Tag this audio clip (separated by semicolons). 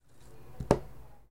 rock; stone